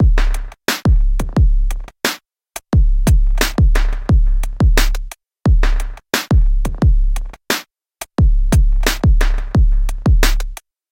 88bpm fx A+B pattern (Maneki Neko)
engineering, beat, PO-12, drums, teenage, operator, drum-loop, pocket, machine, neko, maneki, mxr, cheap, 88bpm, Monday, distortion, loop, percussion-loop, rhythm, drum